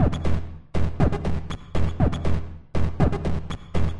NoizDumpster Beats 05Rr
I have used a VST instrument called NoizDumpster, by The Lower Rhythm.
You can find it here:
I have recorded the results of a few sessions of insane noise creation in Ableton Live. Cut up some interesting sounds and sequenced them using Reason's built in drum machine to create the rhythms on this pack.
All rhythms with ending in "Rr" are derived from the rhythm with the same number, but with room reverb added in Reason.
VST, loop, noise, NoizDumpster, percussion, TLR, rhythm, 120-bpm, noise-music, TheLowerRhythm, synth-drums